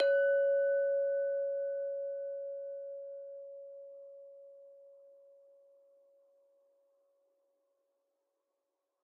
Just listen to the beautiful pure sounds of those glasses :3